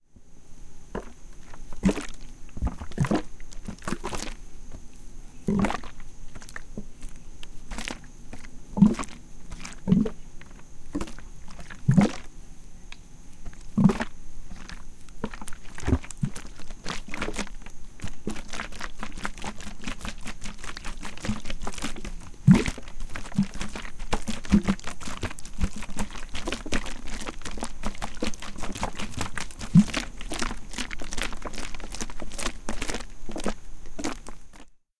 092207 - listerine clean
Bottle of orange Listerine being manipulated in a pretty wonky manner. Recorded in stereo on a Micro Track field recorder.